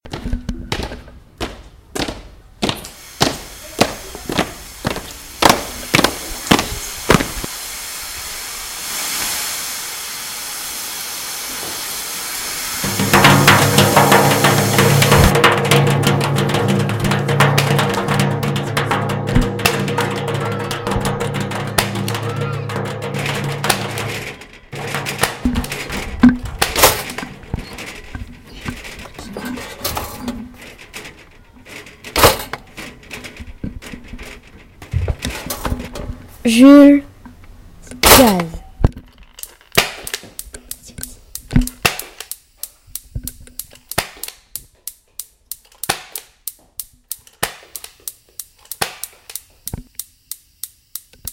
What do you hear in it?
TCR sonicpostcard-yanis,jules
France, Pac, Sonicpostcards